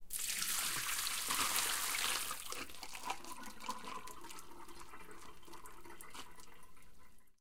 Pouring a plastic cup full of water into a sink that has ice cubes sitting in it.